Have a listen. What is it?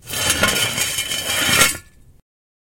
the language of representation surrounding field recording obscures the creative and constructive elements of the process. to record is, in many ways, to make; to think the process of field recording as a one-to-one, direct correspondence between image and reality does not help us understand the real nor the image.
Crate Digging. this field recording was made by dragging the metal cover of a drainage ditch over the hole it covered. the tascam dr100 mkii built-in microphone was 6 inches away from the metal and held at a right angle to the surface. i moved the microphone slowly as i dragged the metal cover. the microphone was set to record in the 'unidirectional' mode. it was then mixed lightly in Logic Pro X.